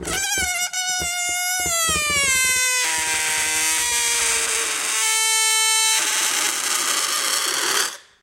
degonfl long racle
various noises taken while having fun with balloons.
recorded with a sony MD, then re-recorded on my comp using ableton live and a m-audio usb quattro soundcard. then sliced in audacity.
air, balloon, field-recording, fun, indoor, inflate, noise